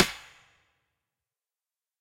several snares with reverb.
snare, clap, reverb